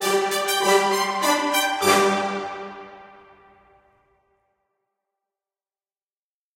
Short Brass Fanfare 2
Short Brass Fanfare.
fanfare, short, brass